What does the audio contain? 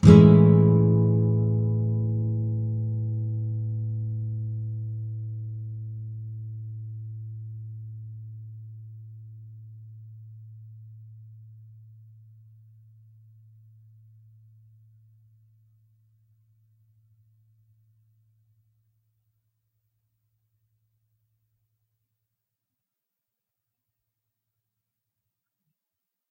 Standard open A Major 7th chord. The same as A Major except the G (3rd) string which has the 1st fret held. Down strum. If any of these samples have any errors or faults, please tell me.